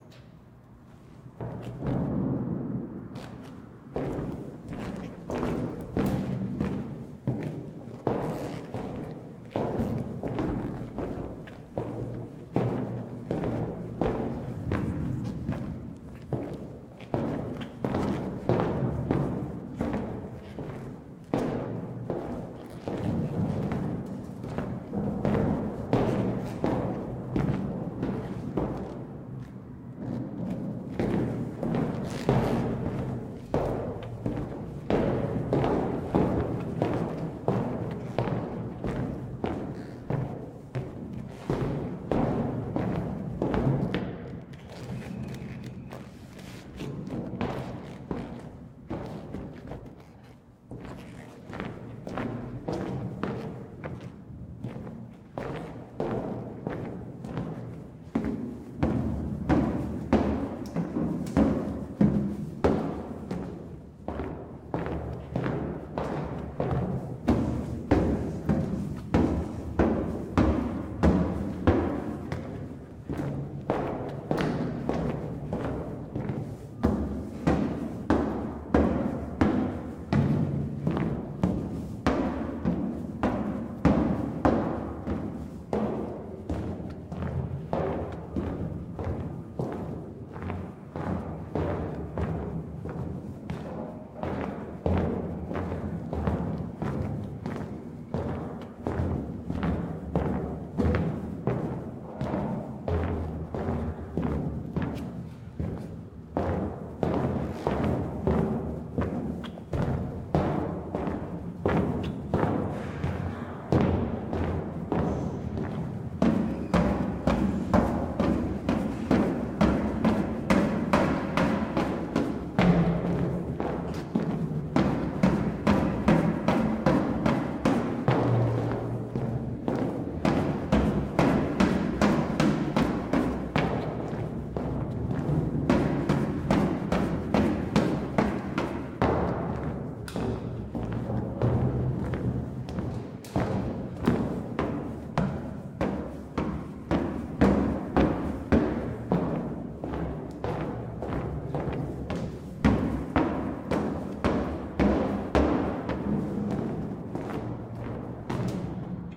FOLEY Footsteps Metal 002
More walking on metal stairs in a reverberant concrete stairwell. Slower than the first one, with more variety of movement.
Recorded with: Sanken CS-1e, Fostex FR2Le